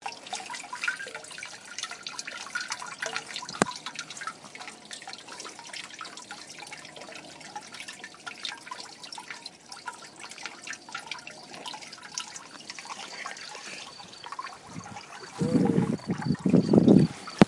mySounds GWAEToy water
Recordings made on a sound walk near Lake Geneva
Switzerland, TCR